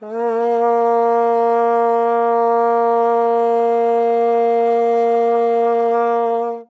Low note (A#) of a plastic vuvuzela played medium.
microphone used - AKG Perception 170
preamp used - ART Tube MP Project Series
soundcard - M-Audio Auiophile 192